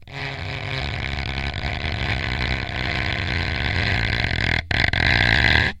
scratch.long.05
daxophone, friction, idiophone, instrument, wood